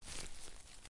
Left Grass/Grassy Footstep 3
A footstep (left foot) on a dry grassy surface. Originally recorded these for a University project, but thought they could be of some use to someone.
bracken, crisp, Dry-grass, feet, field, foot, foot-step, footstep, footsteps, grass, grassy, left-foot, step, steps, walk, walking